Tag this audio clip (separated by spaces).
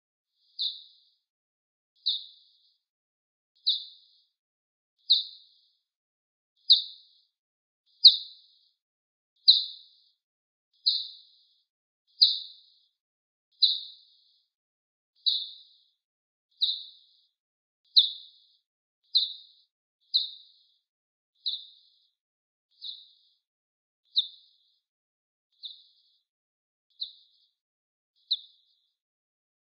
stereo binaural